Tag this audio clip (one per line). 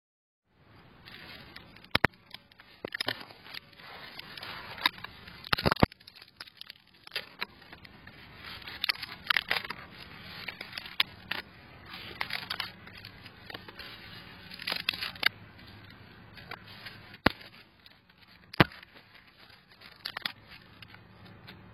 breaking
twigs
wood
sticks
snap